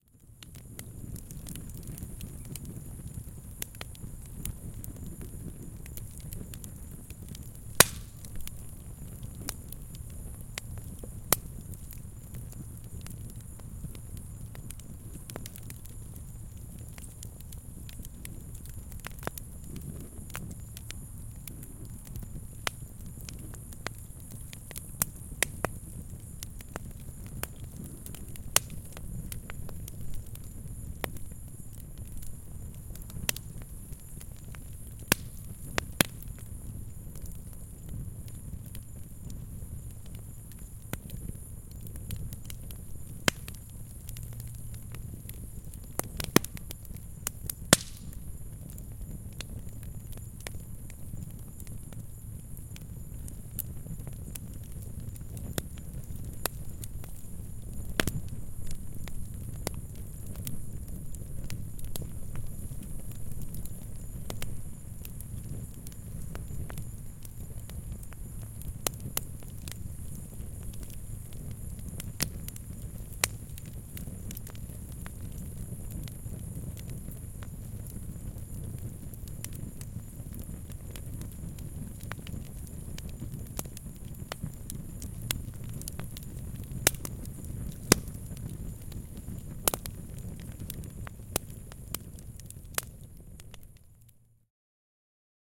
Crackling Fire
A close perspective of a small backyard fire, crickets in the background.
campfire, fire, crickets, crackling, field-recording